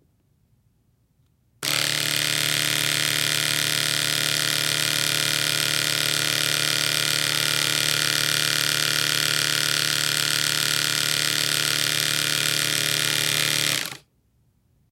machine sound 2 (electric razors) 05
A recording of an electric razor my friend and I made for an audio post project
electric-razor, machine, mechanical